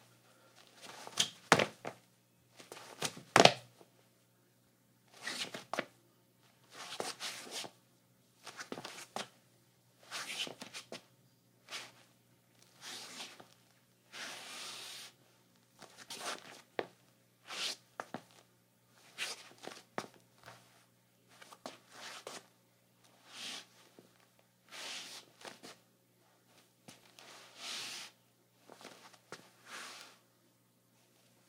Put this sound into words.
01-23 Footsteps, Tile, Slippers, Jumping & Scuffs
Slippers on tile, jumping and scuffs
footstep, footsteps, jump, linoleum, male, scuff, slippers, tile